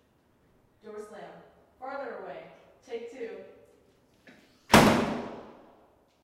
A metal door slam in empty staircase.
slam, door, window